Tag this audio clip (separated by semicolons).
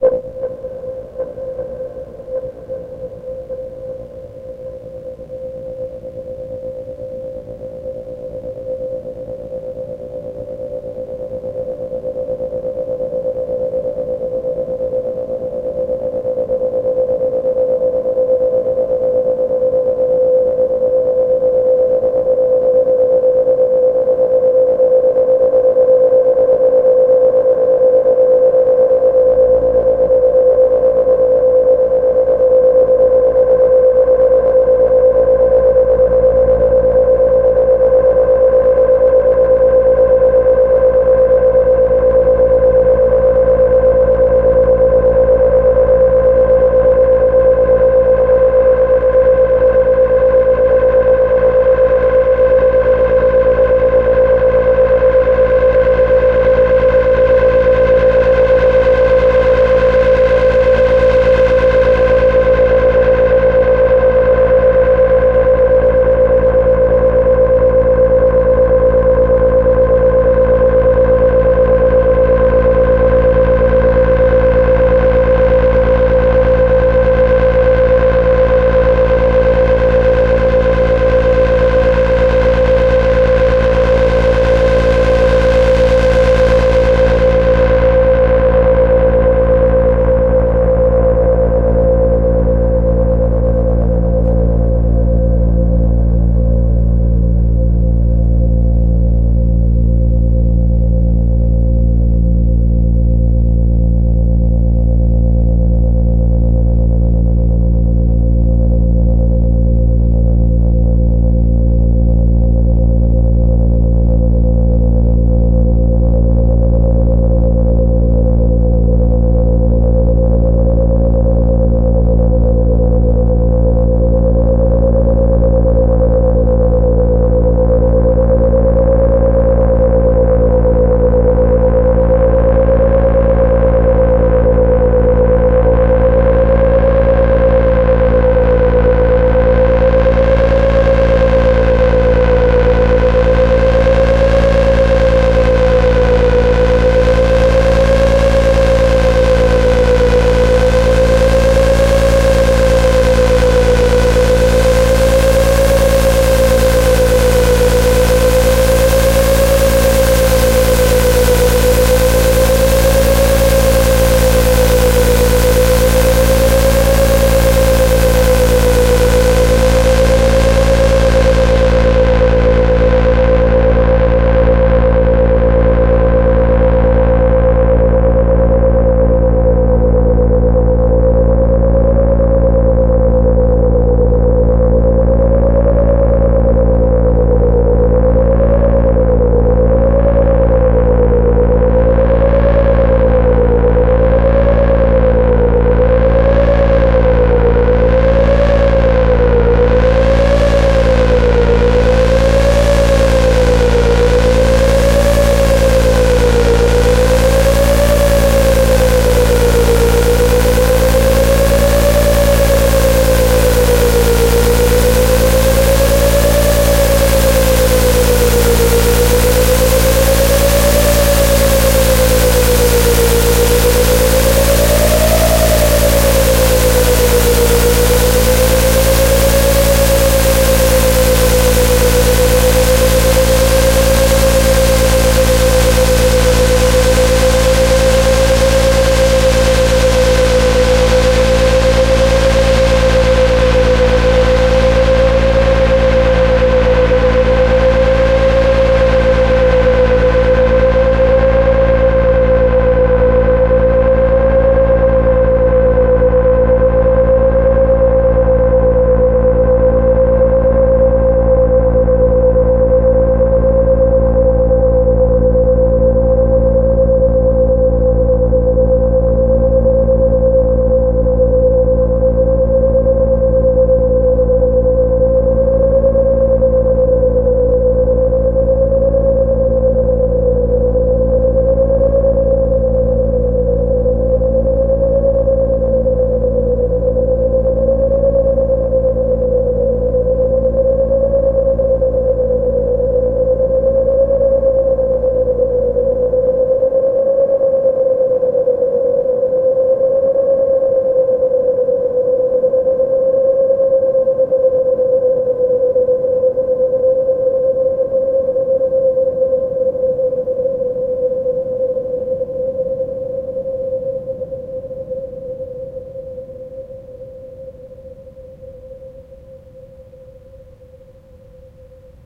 Artificial; Machine; Ambience; Buzz; analog; drone; Industrial; resonating; Noise